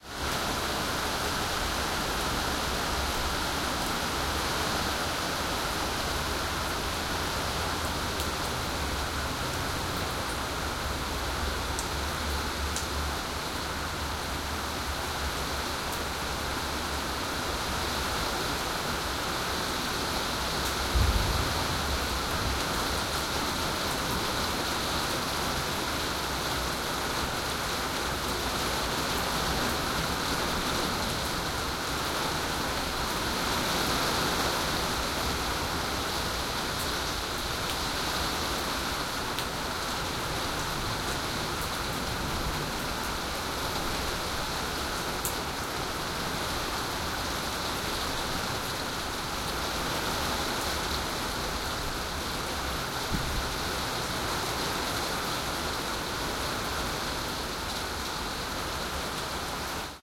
rain medium
Medium tropical rain. San José, Costa Rica.
Equipment: Tascam DR-100 mkii, Peluso CEMC-6 (Cardioid cap), ORTF.
field-recording, nature, rain, water